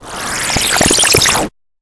Attack Zound-178
Strange electronic interference from outer space. This sound was created using the Waldorf Attack VSTi within Cubase SX.
soundeffect, electronic